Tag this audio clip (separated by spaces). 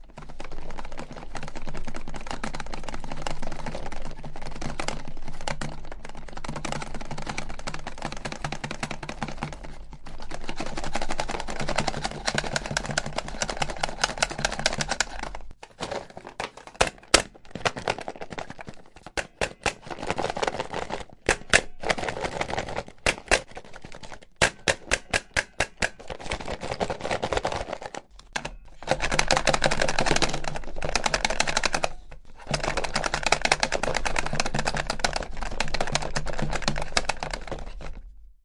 action dark Mystery voice